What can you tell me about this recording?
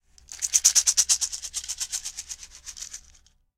NATIVE RATTLE 03
A native north-American rattle such as those used for ceremonial purposes.
native, rattle, first-nations, indian, north-american, aboriginal, hand, ethnic, indigenous, percussion